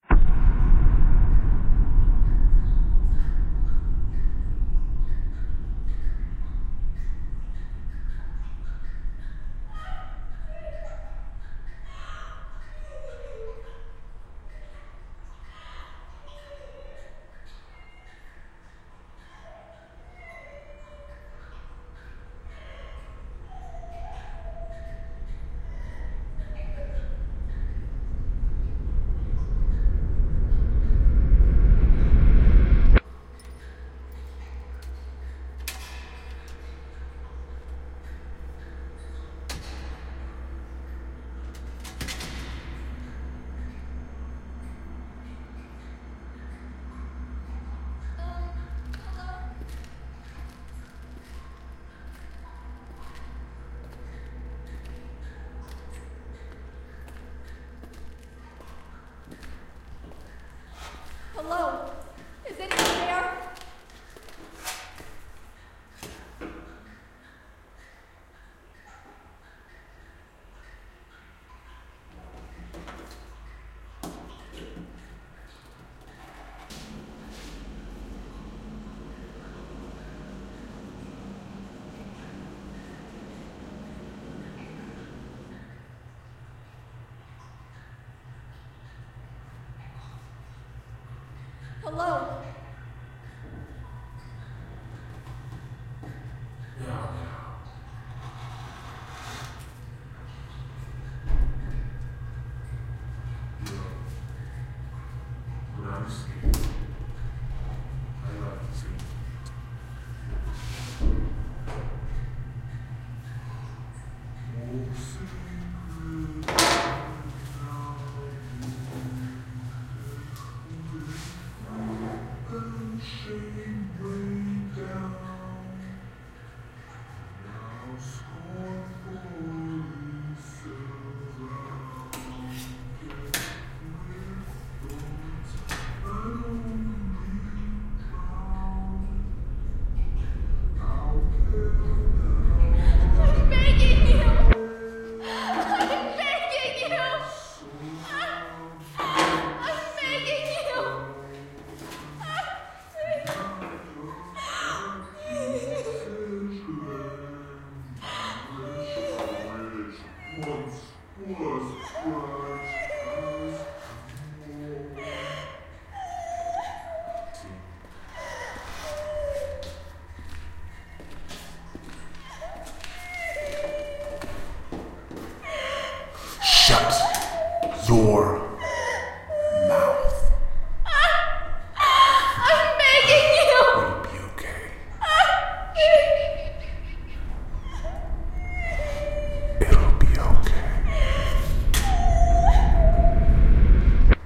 This sound is a mix of others sounds and the male voice is me. made this as a possible soundtrack to a vid I'm thinking of.
11linda (username on here and other websites)
Ashley Eddy (Real name).
336660__chrillz3r__door-metal-opening-and-closing
342566__inspectorj__sewer-soundscape-a
130855__djmastah__cold-cave-a
207861__11linda__lost-confused-hello
216792__klankbeeld__dressmaker-140201-0037
336598__inspectorj__footsteps-concrete-a
364797__11linda__horror-locked-in-scared-female